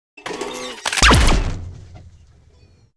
Sci FI Weapon 01
synthesized weapon sound at Korg MS20